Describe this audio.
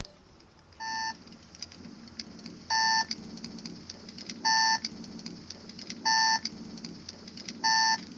radio alarm distress signal
Alarm signal through the radio of a military vehicle.
radio, signal, alarm